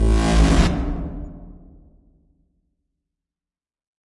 Gritty synth A
Shrinking sound with reverb. Made with Waves FlowMotion.
bass, multi-sample, synth